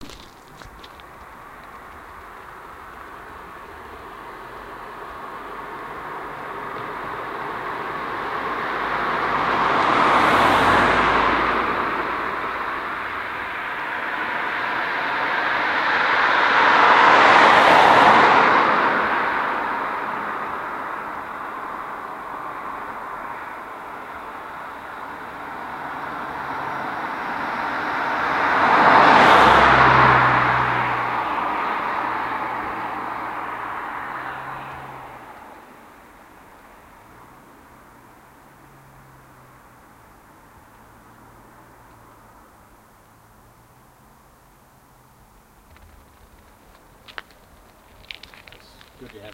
Cars passing on a quiet road. No post processing.